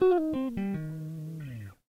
Tape El Guitar 44
Lo-fi tape samples at your disposal.
collab-2, el, guitar, Jordan-Mills, lo-fi, lofi, mojomills, tape, vintage